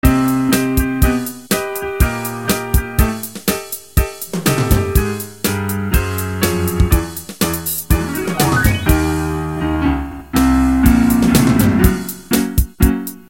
Piano Piece with Drums
Sample, Song, Piano, Original, Music